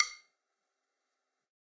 Sticks of God 007
drum, drumkit, god, real, stick